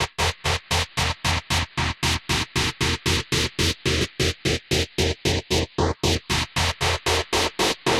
Agressive sweep synth loop mod. 1 120 bpm
agressinve synth loop with more processing and pitchshifting
source file:
sound-fx, electro, effect, tonal, ambient, sound-effect, processed-sound, loop, idm, modulated, percussive, glitch, noise